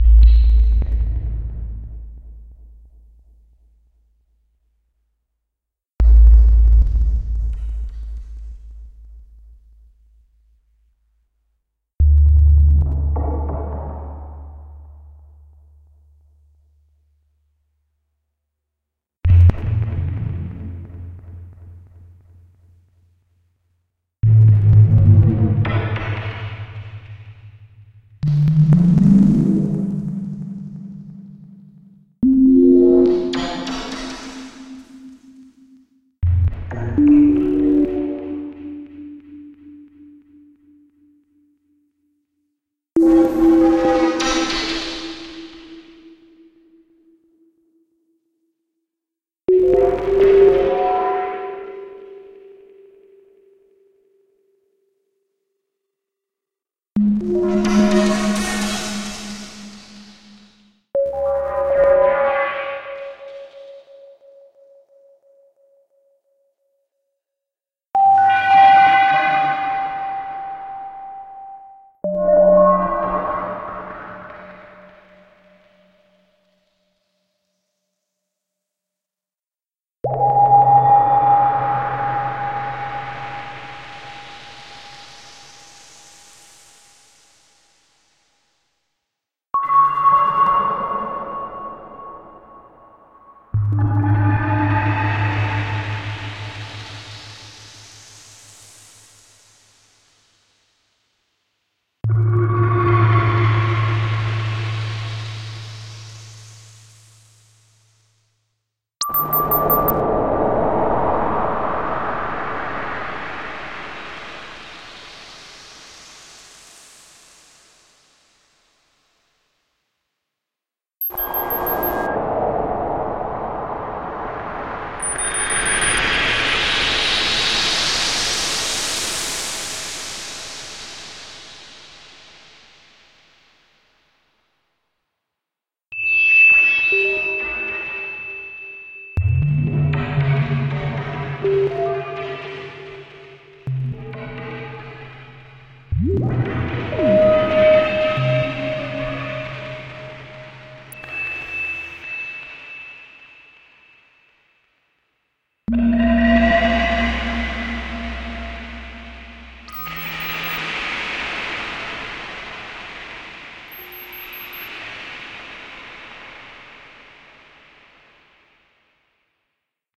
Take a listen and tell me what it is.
Abstract Sounds A - A MakeNoise Morphagene Reel
abstract, ambient, atmosphere, atmospheric, avant-garde, dark, electronic, generative, make-noise, makenoise, mgreel, micro-sound, microsound, morphagene, musique-concrete, mysterious, reel, sounds, strange, tonalities, weird